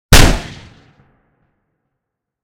This is a gunshot from a series of 4 created using only household objects and myself.